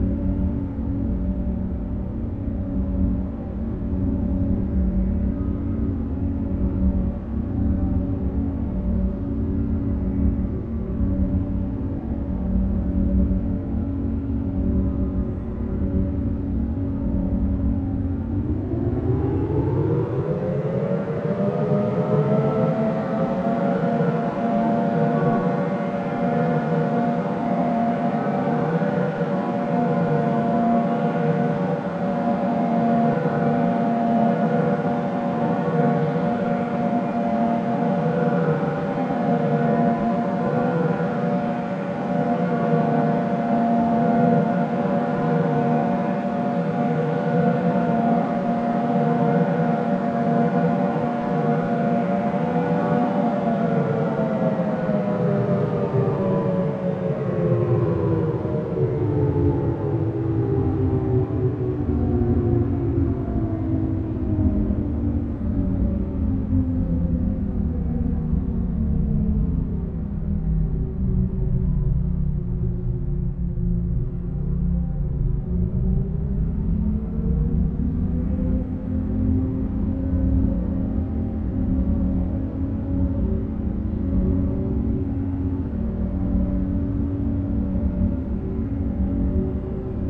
A seemless loop -- a longer ambient background noise kind of like engine noise, which changes pitch smoothly, rising to a held level, falling low, then restoring. This is from an Analog Box circuit created for the purpose. This is intended to give you some rising and falling segments to use, as opposed to the other similar sounds in this pack that do not rise or fall, but obviously if you have no need for such a thing this will not be useful.
abox, ambient, background, falling, loop, noise, vessel